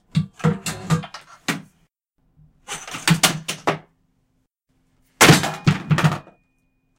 plastic small tools drop
Some small plastic tools being dropped on a wood floor.
Recorded with a Blue Yeti mic, using Audacity.